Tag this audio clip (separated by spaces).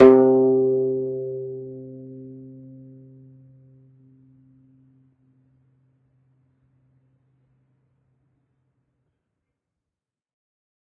flickr kayageum pluck string zither guzheng acoustic zheng kayagum koto